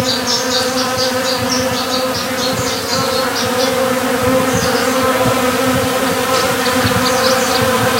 Some bees flying around some fruits. Some parts are good, some have some wind on it. If you need a short sample, this may be it. These are teneriffan bees btw.. Oh, yes, recorded in 2008 with a digidesign m-box and a SM58 if I remember right. Old but ok.